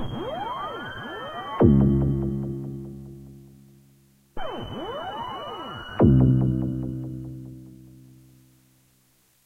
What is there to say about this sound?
join us
playing with analogue kit
analogue, bass